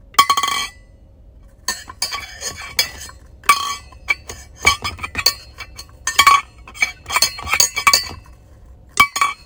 metal on metal
hitting a small metal bowl in various ways with a metal bar